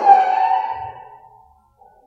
Bonks, bashes and scrapes recorded in a hospital at night.